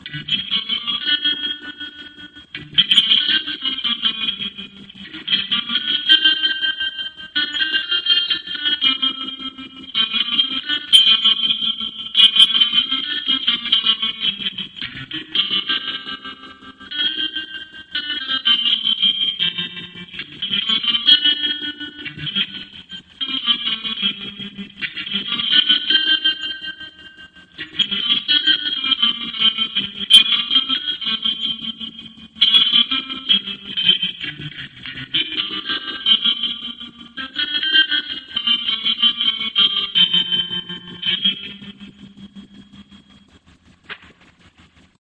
Teks Sharp Twangy Guitar Trem Phase
The smae Guitar sound with added EFX